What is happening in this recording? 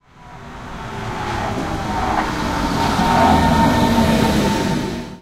An S-Bahn train arrives in Berlin.
Recorded with Zoom H2. Edited with Audacity.
bahn, driving, station, train, train-station, transportation, urban, vehicle